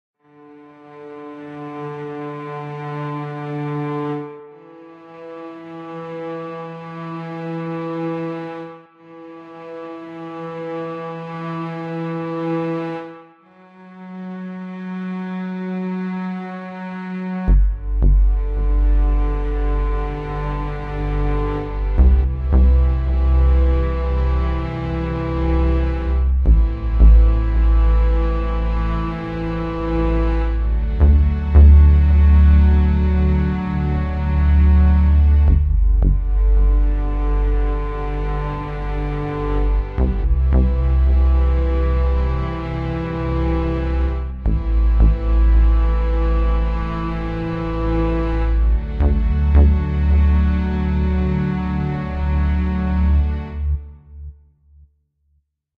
Autumn leaf
A cinematic string loop. Made in Adobe Audition and garage band. Inluding synth: machine language, bass and violins. Recording date 5th January 2014.
bass, Cinema, Loop, melody, music, Orchestra, Strings, synth, violins